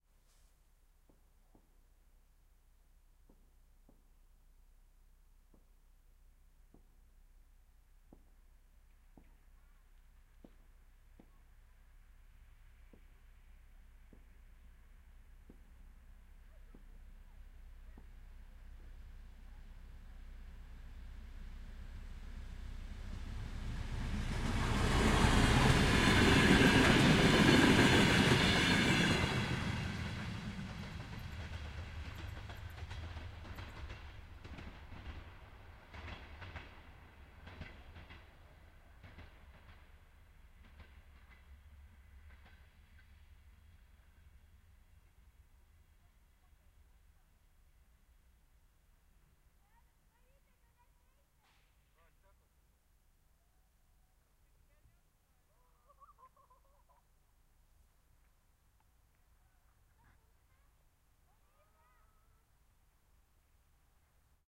Train Passing By Medium Speed R to L Night Amb Fireworks Children
Multiple takes of a train passing by.
Field-Recording, Locomotive, Railway, Stereo, Train